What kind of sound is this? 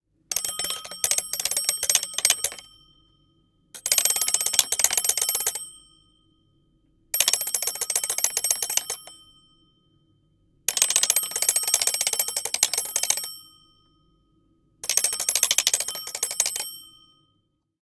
Nome da fonte sonora: Despertador .
Efeito sonoro gravado nos estúdios de áudio da Universidade Anhembi Morumbi para a disciplina "Captação e Edição de áudio" do cruso de Rádio, Televisão e internet pelos estudantes:Cecília Costa Danielle Badeca Geovana Roman Tarcisio Clementino Victor Augusto.
Trabalho orientado pelo Prof. Felipe Merker Castellani.
aum, despertado, rtv